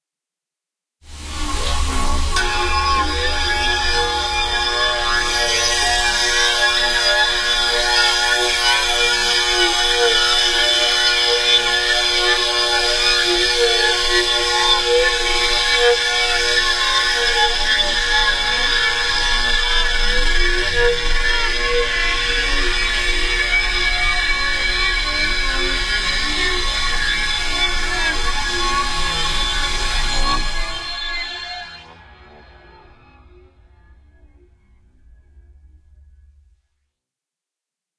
A large heat dish starting up and humming about. Then modulated with various plugins. Echo, phase, etc.
buzz, hum, experiment, heat, talking, fan, electronics, future, space, aliens, modulation